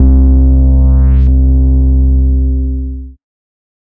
Acid Bass: 110 BPM C2 note, not your typical saw/square basslines. High sweeping filters in parallel Sampled in Ableton using massive, compression using PSP Compressor2 and PSP Warmer. Random presets, and very little other effects used, mostly so this sample can be re-sampled. 110 BPM so it can be pitched up which is usually better then having to pitch samples down.
110, 808, 909, acid, bass, beat, bounce, bpm, club, dance, dub-step, effect, electro, electronic, glitch, glitch-hop, hardcore, house, noise, porn-core, processed, rave, resonance, sound, sub, synth, synthesizer, techno, trance